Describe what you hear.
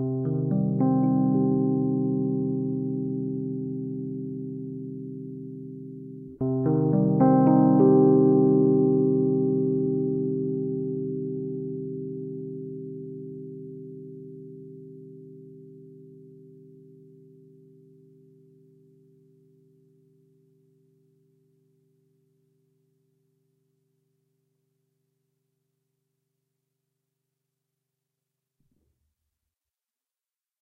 rhodes mystery bed 5
Arpeggio chord played on a 1977 Rhodes MK1 recorded direct into Focusrite interface. Has a bit of a 1970's mystery vibe to it.
chord, electroacoustic, keyboard, mysterious, rhodes, suspense, vintage